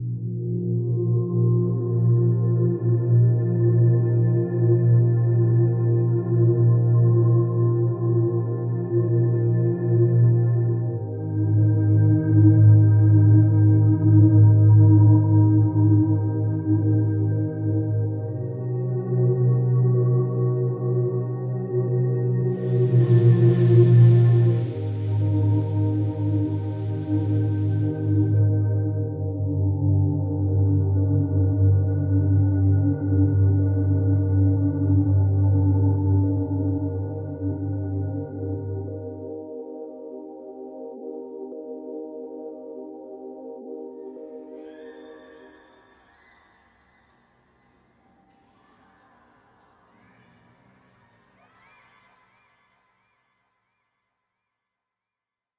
Playground memories
Creepy ambient track
eerie, music, sci-fi